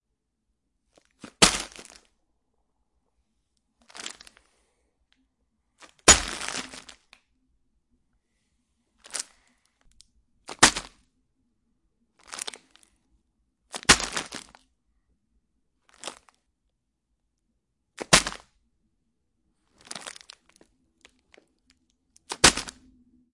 Dropping bag of veggies onto floor repeatedly
The sound of a bag of snap peas being dropped to the floor and then picked up repeatedly. I needed a sound effect like this for a project but thought it'd be worth sharing for anyone else with a similar niche need!
bag, drop, food, frozen